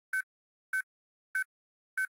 Recreating the sound of the on-screen cursor from the movie The Matrix.
Note: The sound quality of this sound will be much better when listening to the downloaded file than in the preview mode on the site.
Enjoy it. If it does not bother you, share links to your work where this sound was used.